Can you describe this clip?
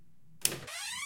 creaking-door-open01
A creaky door quickly being opened.
creaking, open, creaky, fast